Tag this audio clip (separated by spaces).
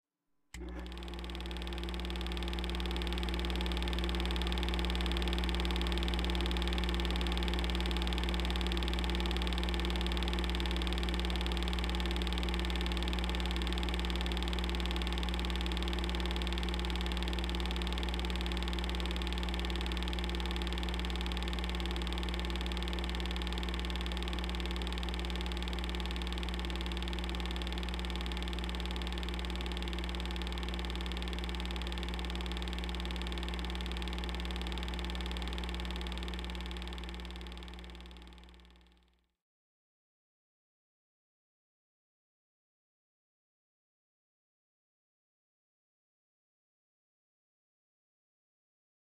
whir film clicking running 8mm movie projector machine